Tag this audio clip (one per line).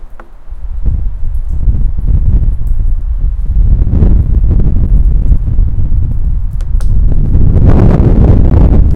conversation
figure
splashing
rain
dripping
field-recording
raindrops
water
behind
people
person